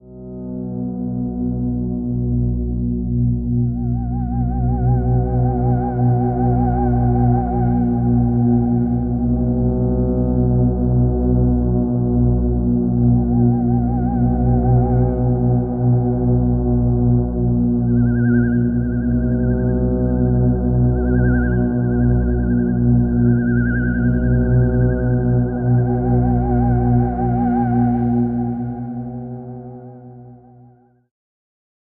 Alien Abduction Atmosphere
Sound Atmosphere for Science Fiction Films.
Atmosphere, Cinematic, Effect, Film, FX, Movie, Sound